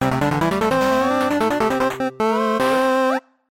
Win SFX
A small musical theme played when a player reaches the end of a video game.